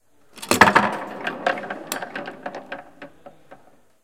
soccer table balls
This file was recorded in campus poblenou of Pompeu Fabra University specifically in the soccer table of the caffetery. The sound was recorded after pressing the balls button.
balls, campus-upf, soccer, table, UPF-CS13